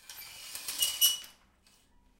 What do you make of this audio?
curtain creak
shower curtain pulled back slowly so it creaks